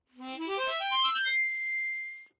C Harmonica Glassando Up 02
This is a recording I made during a practice session. Played on an M. Hohner Special 20.
C, Key